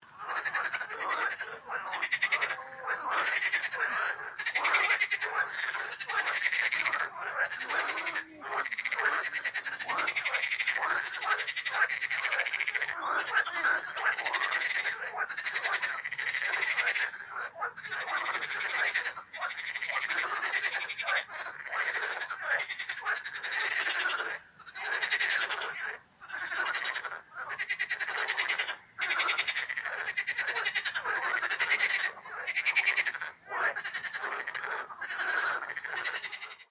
Frogs in summer, river Yauza (Moscow region). Recorded by me with Jiayu G4.